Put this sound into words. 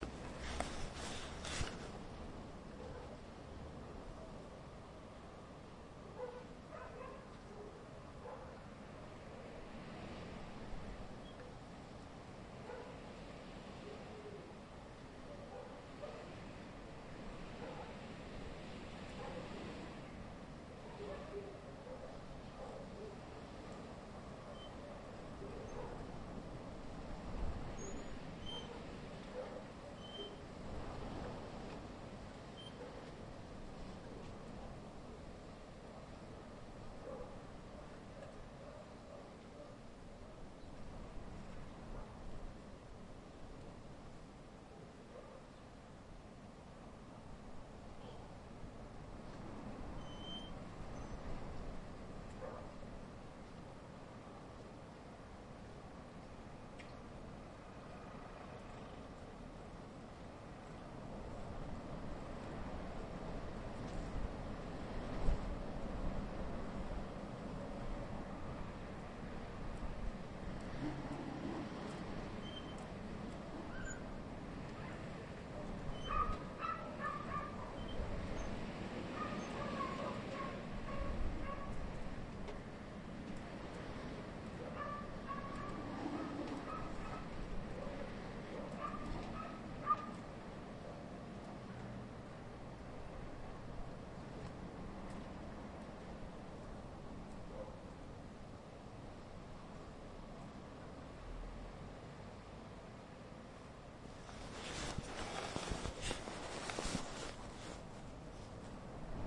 windy day in a small french village
A windy winter day in my small village in the french countryside.
Dogs barking, something squeaking, a tractor passing by...
wind, countryside, dogs